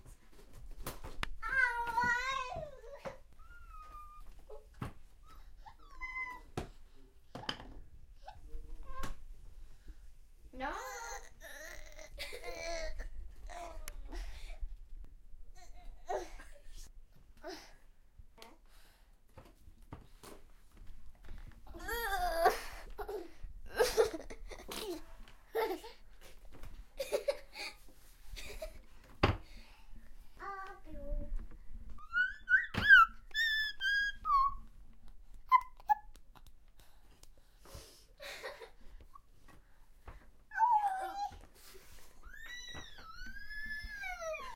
8-00 Atmosphere of children
Atmosphere of children
atmosphere, cz, panska